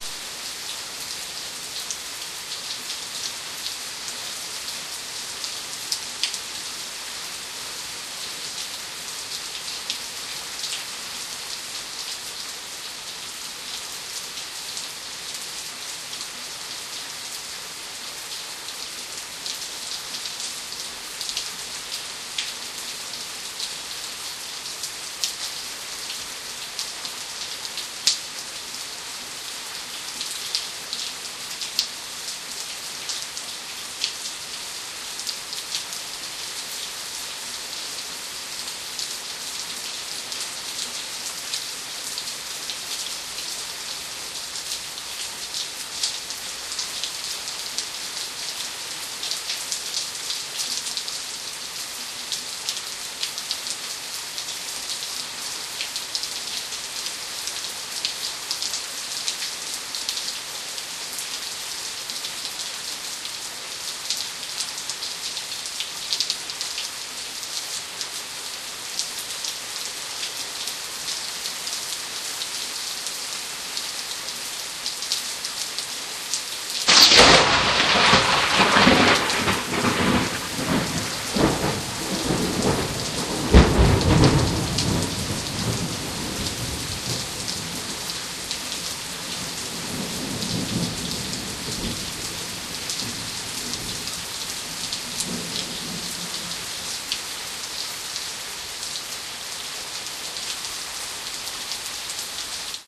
memorial rain117
Memorial Day weekend rain and thunderstorm recordings made with DS-40 and edited in Wavosaur. Rain from my front doorstep is suddenly interupted by a fairly close strike at aroun 1:16... turn your speakers down... I warned you.